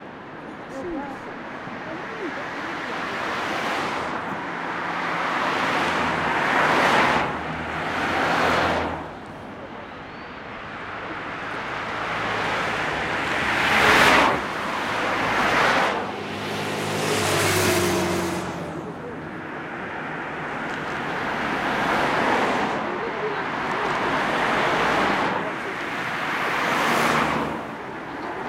Cars passing by with a hint of people talking in the background